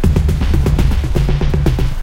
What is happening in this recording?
kbeat 120bpm loop 10
A drum percussion loop at 120bpm. This loop is harder - more dirty sounding.
120bpm, beat, drum, drum-loop, loop, percussion, rhythmic